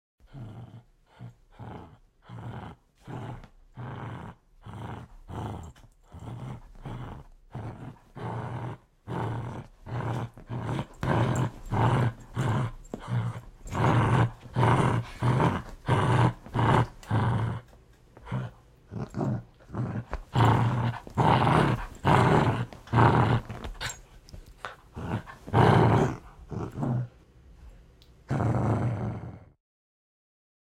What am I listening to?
Dog Growls
Playing Tug-o-war with my dog.